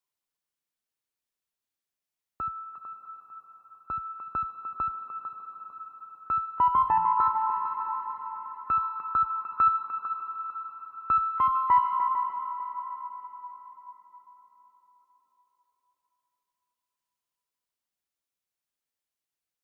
Stratus Plucks
Distant soft plucks using Z3ta+2.
[BPM: 100]
[Key: E minor]
100, 100-BPM, 100BPM, BPM, Clouds, Distant, Fill, Gentle, High-Frequency, Minor, Open, Pluck, Reverb, Stereo, Stratus, Synth